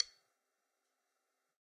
Sticks of God 005
drum, drumkit, god, real, stick